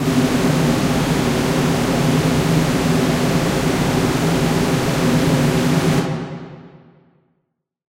SteamPipe 2 Ambiance E3
This sample is part of the "SteamPipe Multisample 2 Ambiance" sample
pack. It is a multisample to import into your favourite samples. The
sound creates a stormy ambiance. So it is very usable for background
atmosphere. In the sample pack there are 16 samples evenly spread
across 5 octaves (C1 till C6). The note in the sample name (C, E or G#)
does not indicate the pitch of the sound but the key on my keyboard.
The sound was created with the SteamPipe V3 ensemble from the user
library of Reaktor. After that normalising and fades were applied within Cubase SX & Wavelab.
ambient, atmosphere, industrial, multisample, reaktor, storm